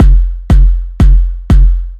Kick Loop 4
Big room kick drum with a touch of noise.
[BPM 120]
[Root: F1 - 43.65hz]